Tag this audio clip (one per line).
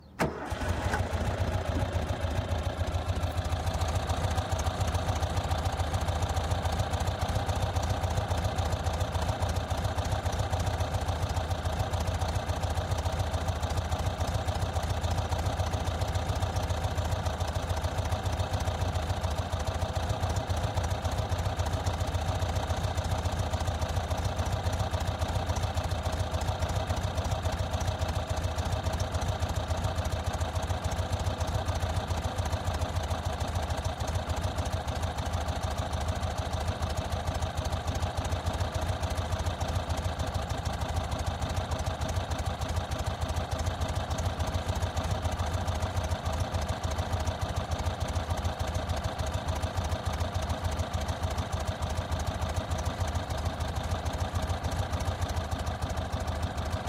vehicle
motor
van
type2
revving
volkswagen
throttle
car
start
engine